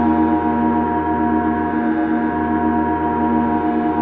Gong Drone
Originally a heavy gong hit, I processed the sample with reverbs and delays to sustain it and create a loopable drone sample out of it.
dark, metal, drone-loop, texture, drone, ambient, industrial, gong, mystical